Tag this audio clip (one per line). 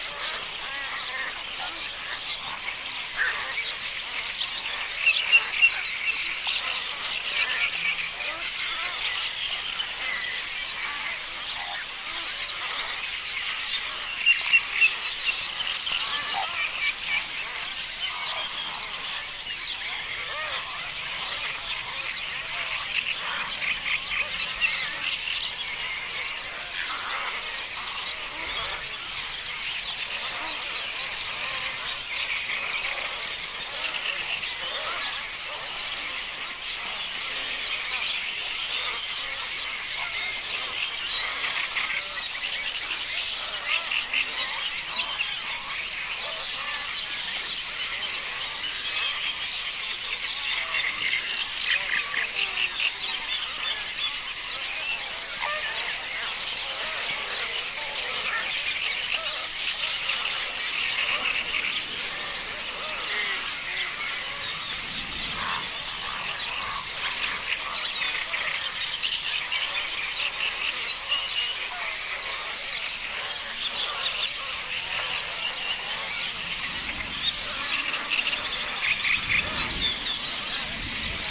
nature
summer
night
field-recording
bird-colony